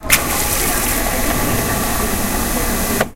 Coho - Dispensing Diet Pepsi
This is a recording of someone dispensing Diet Pepsi from the soda fountain at the Coho. I recorded this with a Roland Edirol right next to the dispenser nozzle.
pepsi, fountain, liquid, soda, drink